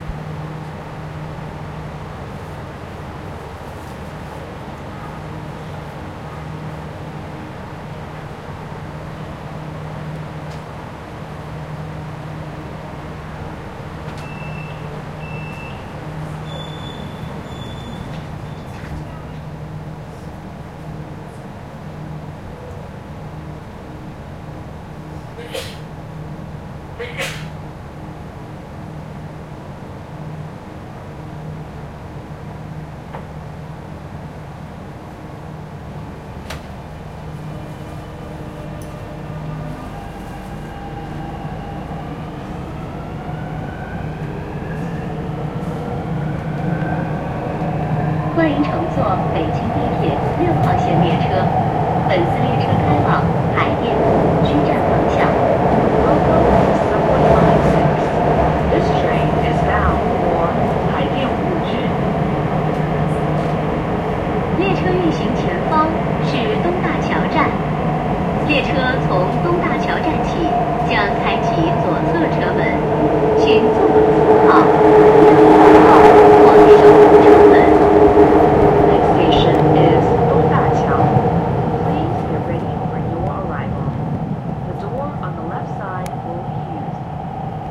Beijing Subway (China)
Field-recording of the sound inside the subway of Beijing (August, 2018).
recording, field-recording, metro